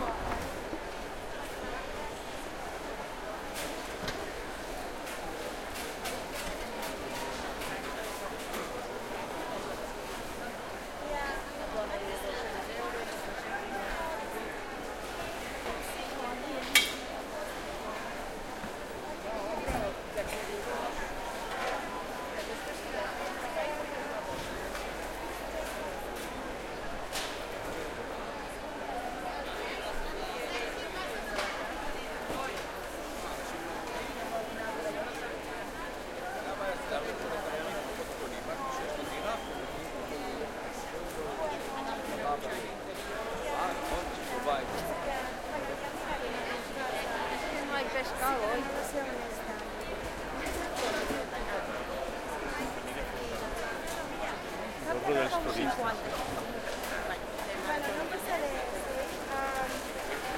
foodmarket barcelona ambience 3
market, food, central, mercado, barcelona, cars